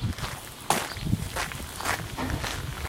footsteps on gravel
Walking on gravel.
feet, steps, walking